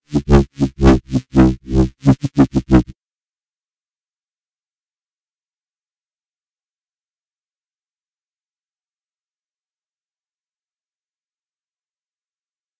bass resample 3
crazy bass sounds for music production
bass, resampling, sound-design, wobble